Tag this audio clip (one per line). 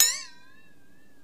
bell
clang
ding
flanger
gong
metal
ping
water